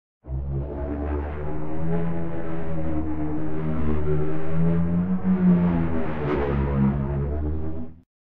A poor recreating of the Legend of Zelda zombie noise.